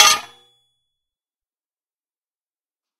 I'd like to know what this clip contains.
3mm steel plate hit with a hammer once on a Lokomo 125 kg anvil and the sound is long.